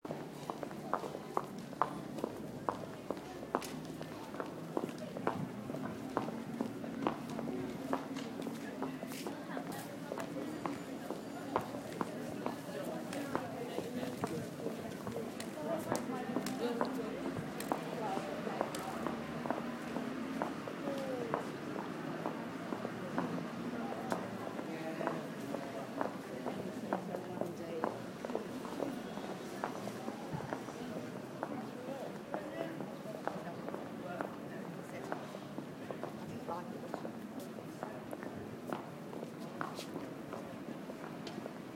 footsteps on the pavement / sidewalk, heels